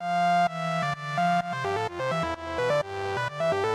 A preset that I made which has a club rave to it. Good for any edm type of music.
Effects include reverb,slight delay and is also side chained. Hope you enjoy it :)